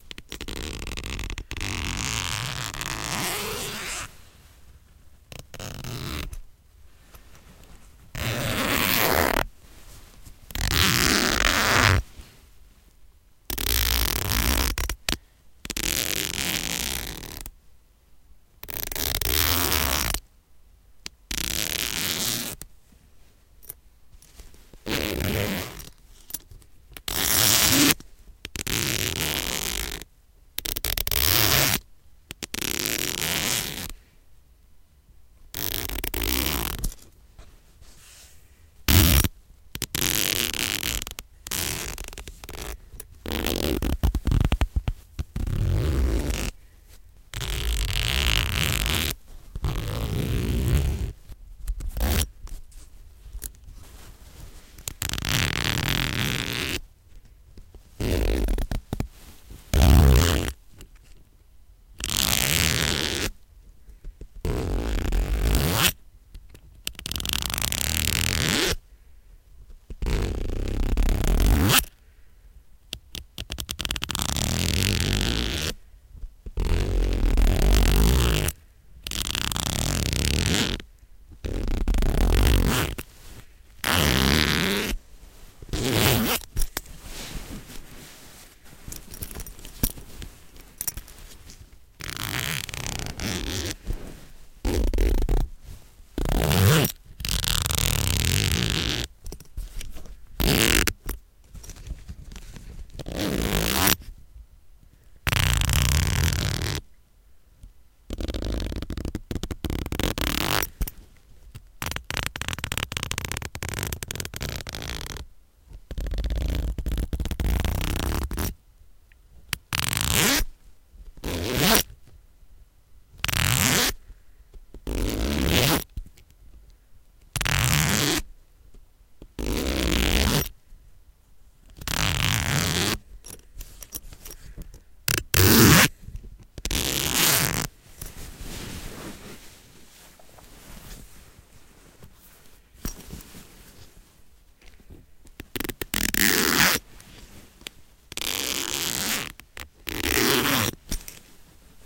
Zipper Variety 1
Recorded with a Blue Yeti in a treated room. Variations of a zipper zipping & unzipping. Jingle of metal. The rustle of fabric.
Thank you for using my sound for your project.
unzipping, packing, noisy, variety, close